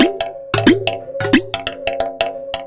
Done with Redrum in Reason
redrum, wavedrum